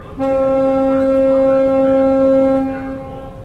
Staten Island Ferry Horn (Close)
The Staten Island Ferry horn close up, New York City
boat, close, engine, ferry, field-recording, harbor, horn, new-york, nyc, port, ship, staten-island